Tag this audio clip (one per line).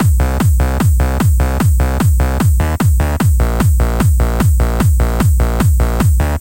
beat
hard
hardtrance
trance